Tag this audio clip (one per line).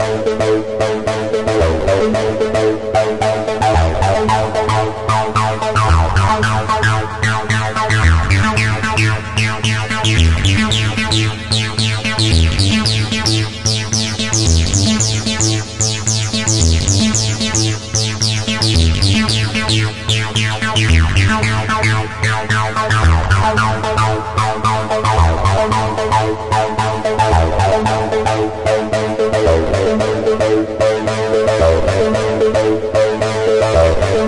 112
club
dance
effect
trance